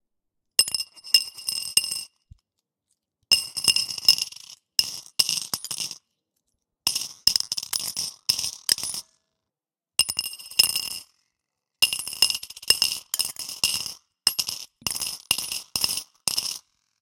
Recording of some coins falling into bowl. Recorded with a SM-58.